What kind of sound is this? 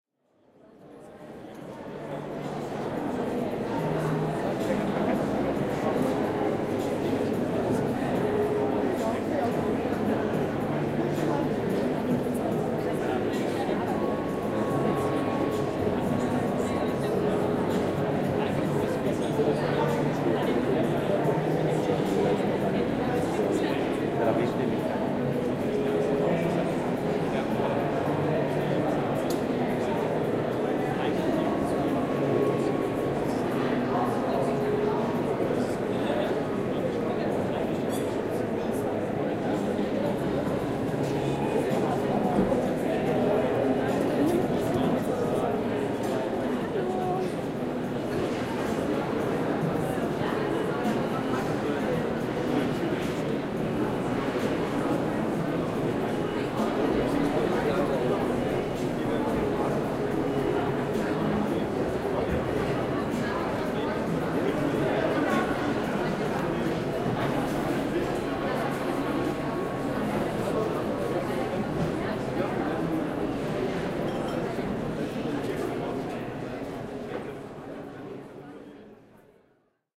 Fashionweek Messe Convention Atmo
a general atmosphere of the Berlin fashion week convention 2019. indoors at an old power station.
convention, fashion, indoors, atmosphere, berlin